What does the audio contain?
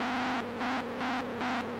Similar sound to the previous samples on this pack, slightly different rhythm.
From the Mute Synth 2.
rhythmic, Mute-Synth-II, noise, rhythm, Mute-Synth-2, seamless-loop